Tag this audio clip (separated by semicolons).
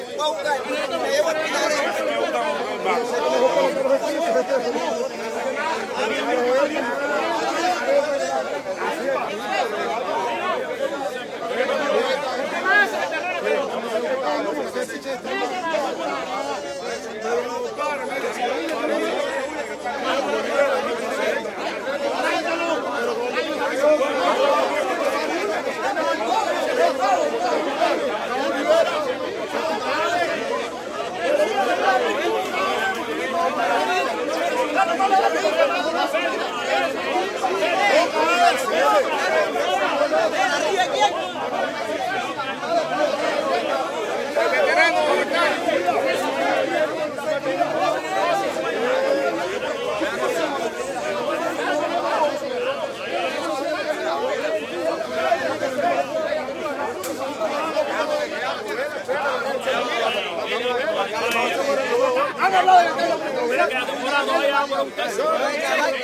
walla; spanish; cuban; shout; crowd; men; exterior; baseball; yell